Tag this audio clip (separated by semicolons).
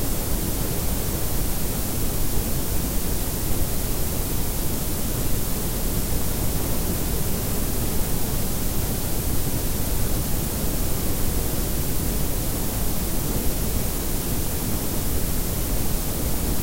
Analog; Blank; Cassette; Hiss; Noise; Tape; White